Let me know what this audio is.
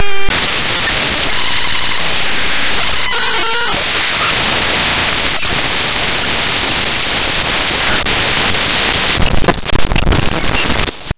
Glitch - EmU
These Are Some Raw Data. Everyone Knows that Trick, Here's My take on it, Emulators (your Favorite old school RPG's), Open LSDJ in Audacity, Fun Fun.
audacity, cyber, data, distortion, glitch, raw, snes